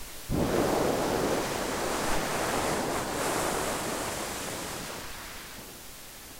Strong winds sound effect
A blizzard/windy sound effect
Windy, Sea, Blizzard